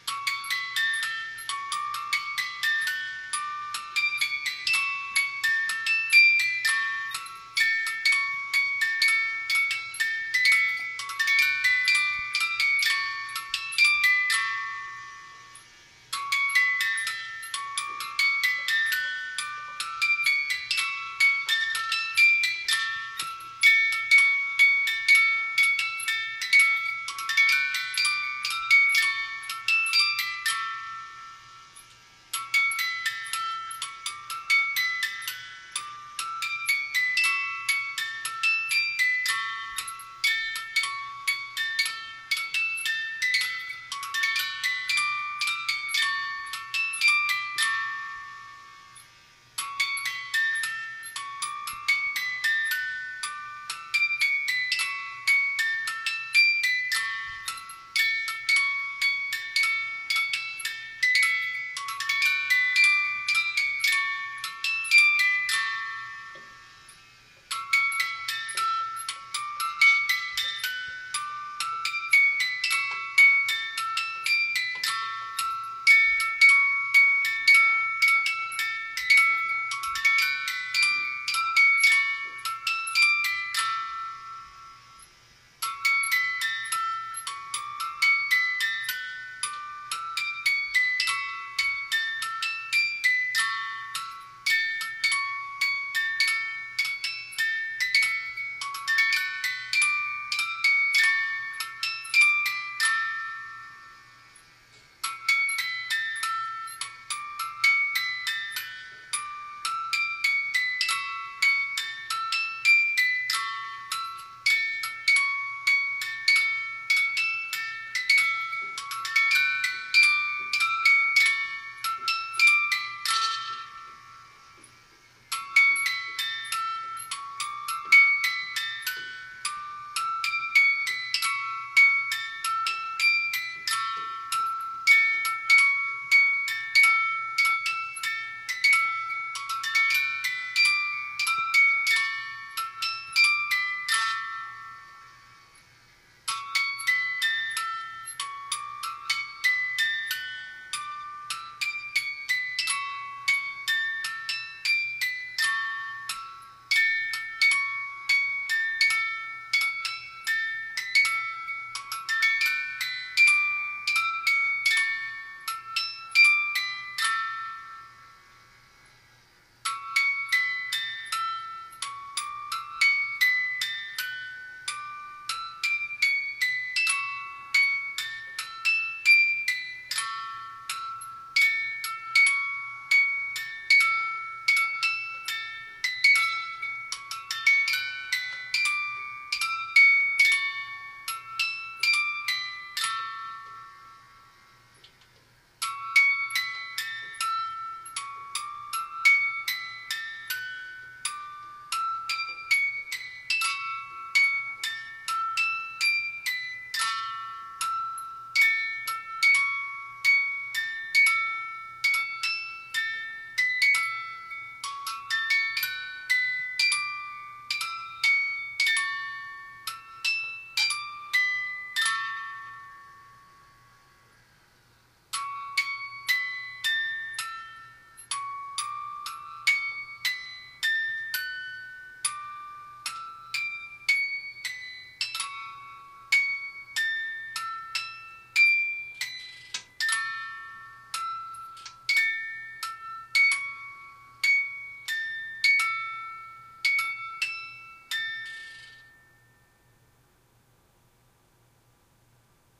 A fairly old plastic Santa head music box that plays jingle bells as his head spins around. This is the long controlled version recorded in the laundry room with the DS-40.